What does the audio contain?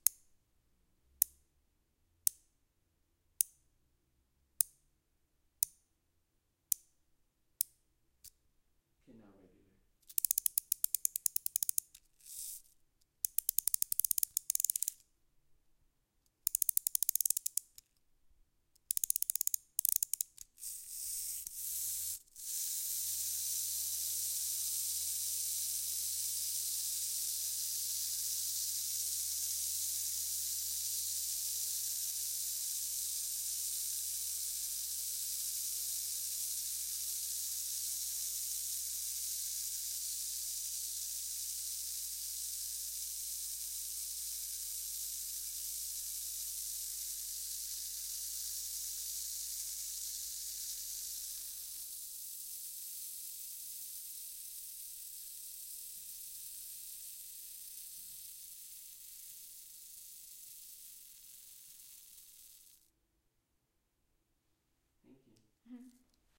wind up toy
Winding up (very slowly at first) a small toy, then it goes. Recorded with AT4021s into a Modified Marantz PMD661.
click, drone